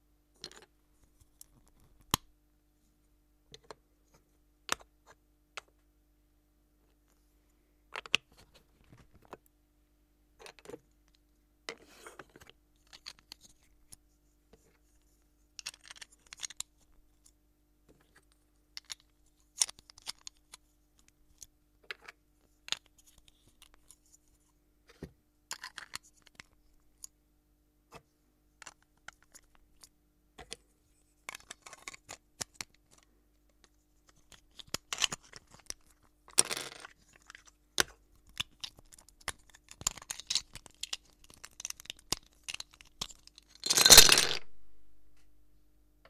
Assembling LEGO bricks
LEGO bricks being manipulated.
{"fr":"Assembler des LEGO","desc":"Des briques LEGO manipulées.","tags":"lego brique brick briques bricks assembler manipuler construire plastique"}
assemble, assembling, bricks, constructing, lego, legos, plastic